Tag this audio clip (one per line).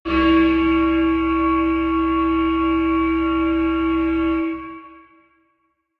ambient
dark
distorted
piano
wave